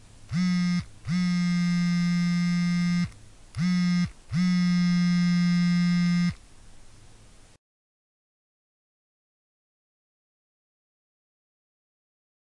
Sound of phone vibrating.